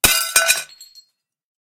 A plate that is dropped and broken.